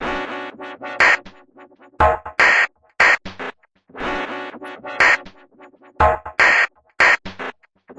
Massive Loop -40
An experimental loop with a melodic 'basic channel' touch created with Massive within Reaktor from Native Instruments. Mastered with several plugins within Wavelab.
experimental, drumloop, loop, 120bpm, minimal